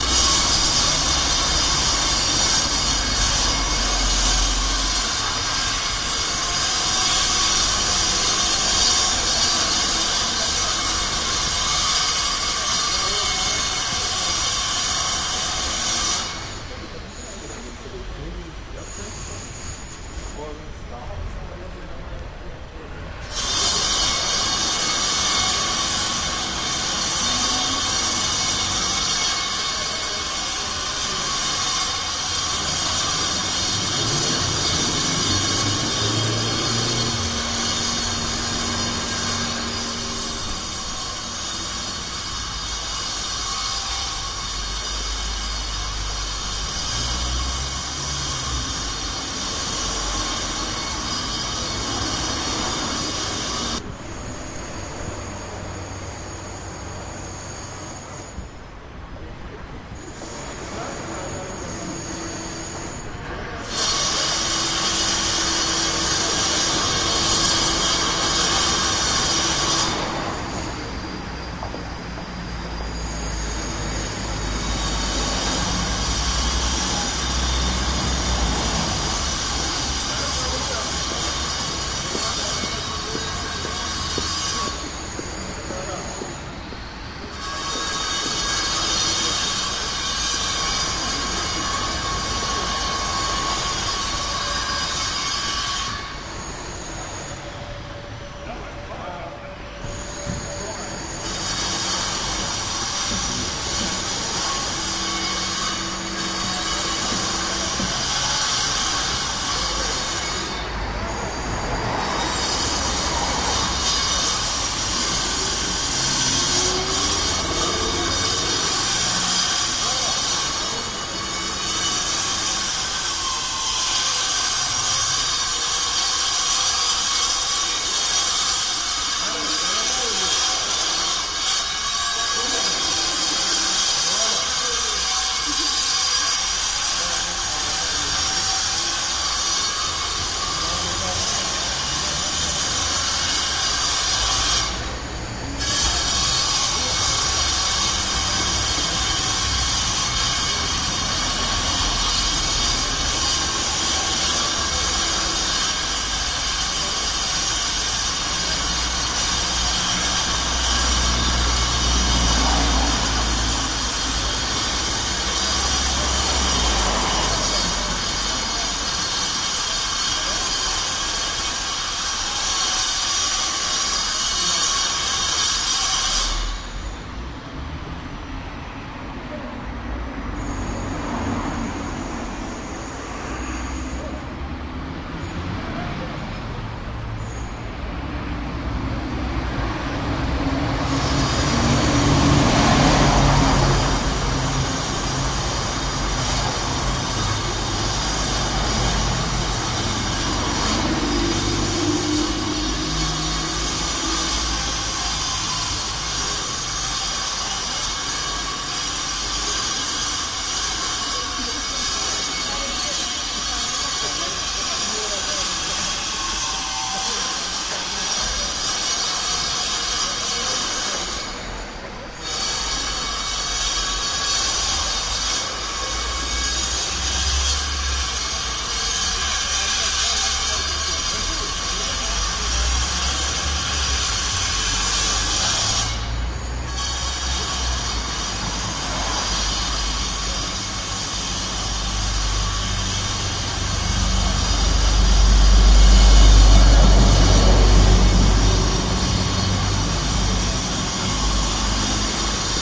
Construction Works House Building Noise in Berlin
Listening to some sounds of construction works from a road next to the site.
Recorded with Zoom H2. Edited with Audacity.
loud, annoying, construction, building, noise, works